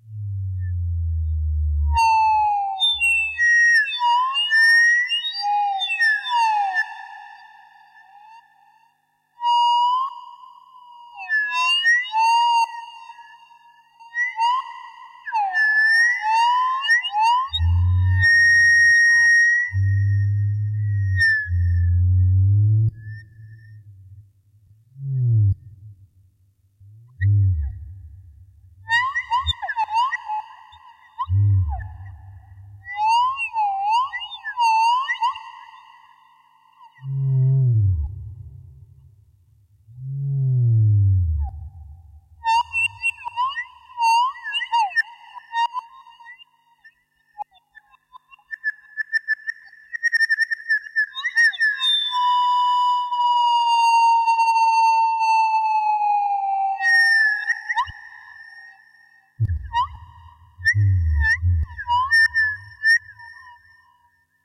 Sine waves with echo on Kaoss Pad made to sound like whales.
Kaoss, Sine, Whalesong